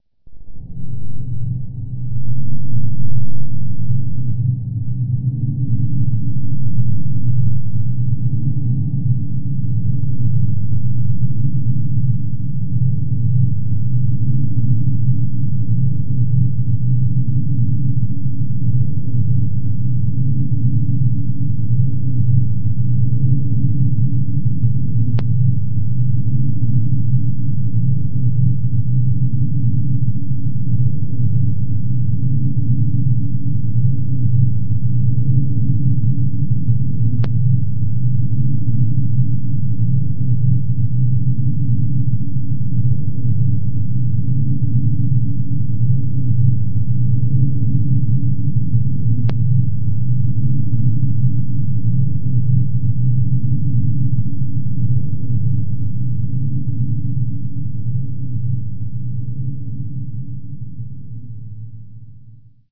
Big Room Ambience 1
This is the sound of a clock ticking, if you can believe it! I added reverb to it and sloooooowed it doooooown to about -81 percent of it's original speed. I think this sounds like an air-conditioner going off in a cathedral. (See tags.) Sorry about those clicks in there! I don't know where they came from, but I think you can probably edit them out. Please excuse. Made with Audacity and a clock ticking, if you can believe it!
air-conditioner-going-off-in-a-cathedral, ambience, bass, big, creepy, room, sci-fi